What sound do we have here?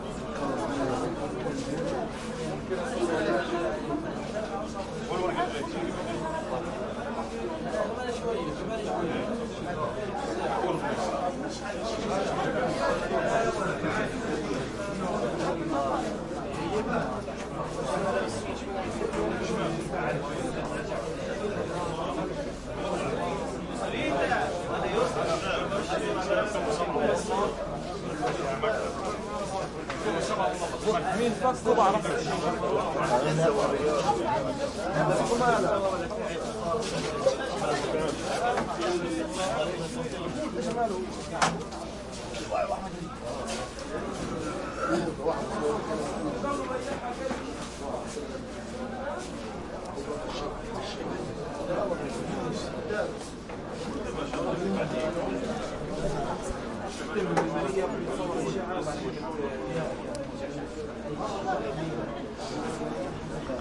Al Shifa hospital triage busy arabic voices and sandy steps movement03 tight hall with curtains Gaza 2016
arabic, busy, hospital, movement, sandy, steps, triage